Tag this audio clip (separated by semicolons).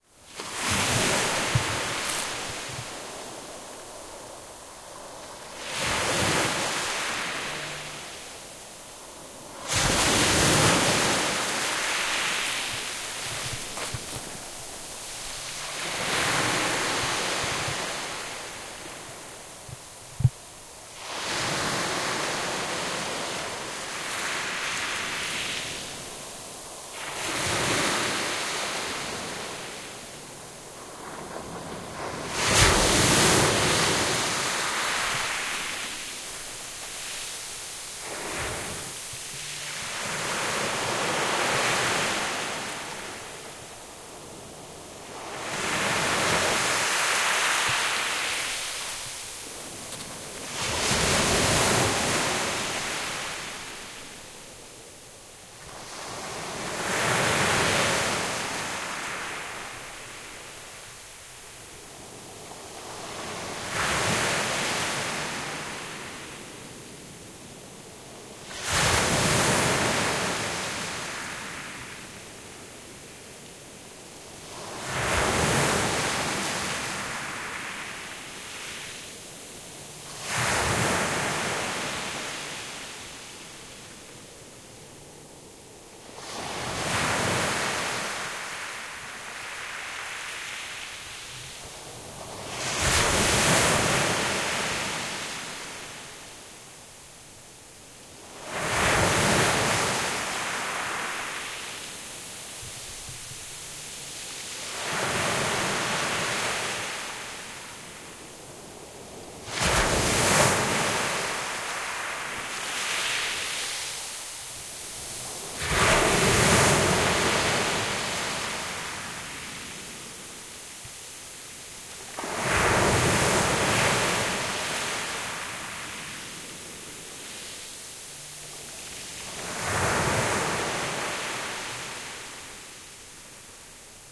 bay; beach; crashing; ocean; waves